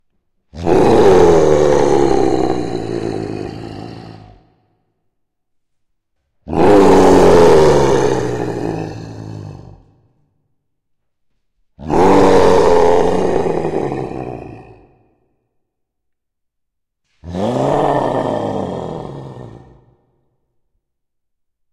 My angry voice screams/shouts slowed down to 50% and added a bit of reverb.
Recorded with Zoom H2. Edited with Audacity.